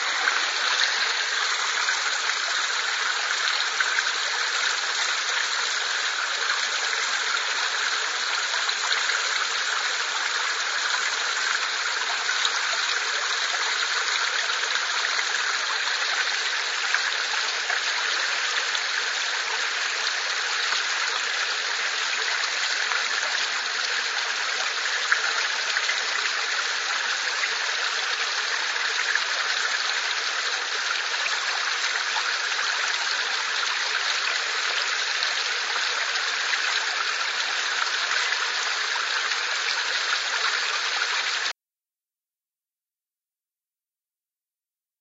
A recording of water coming out of a pipe with some echoing into the pipe. It is at the other end of the same pipe as my waterpipe file.
creek, echo, field-recording, iriver799, pipe, splashing, stream, water